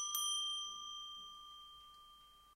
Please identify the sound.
Small Thai Temple bell one ring.
rec by Audiotechnica clips mic.
temple, Thai, ring, bell